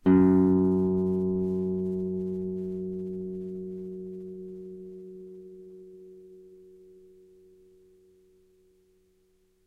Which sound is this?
F#, on a nylon strung guitar. belongs to samplepack "Notes on nylon guitar".